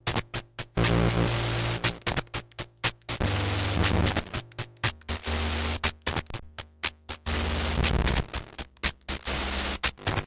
circuit bent keyboard
bent, circuit